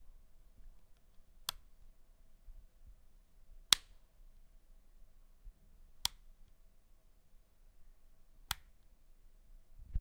Toggling a light switch.
Recorded on a Zoom H1